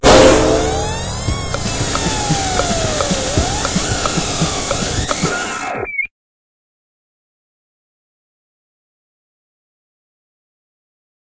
Drone with some 8bit electronic effects and a beatbox background beat. Created with various software, including Adobe Audition and Audacity.
bed, bumper, imaging, radio, radio-fx, splitter, sting, wipe